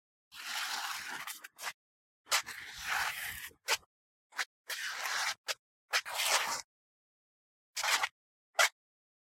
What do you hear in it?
189232 starvolt shuffling-2-rear (Live Volume)
concrete-scrapes, foot-scrapes, shoe-scuffs, shuffling